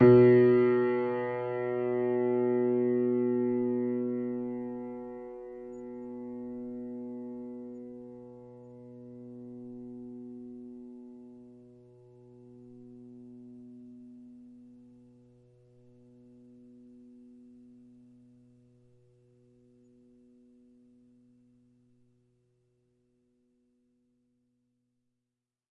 upright choiseul piano multisample recorded using zoom H4n
choiseul, piano, multisample, upright